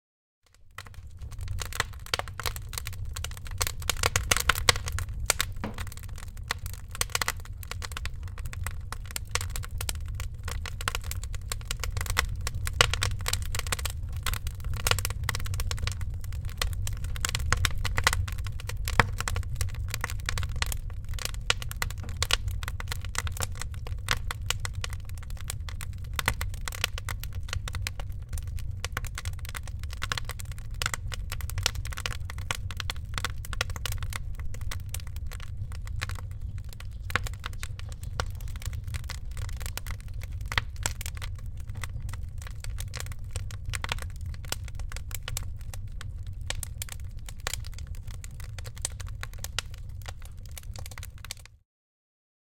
Long clip of home fireplace